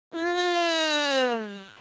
Samples from a FreakenFurby, a circuit-bent Furby toy by Dave Barnes.